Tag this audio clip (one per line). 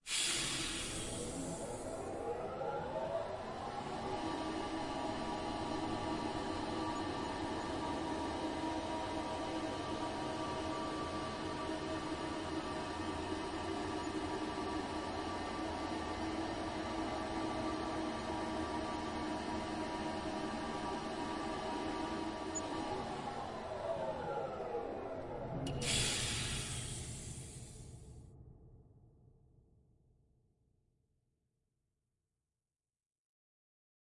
Elevator
Steam
Scifi